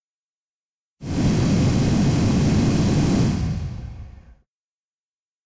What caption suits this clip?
This sound shows the noise that hand dryer does when someone is drying his hands.
It was recorded in the toilets of Tallers building in Campus Poblenou, UPF.
dryer, Tallers, Hand, WC, Wet, campus-upf, UPF-CS14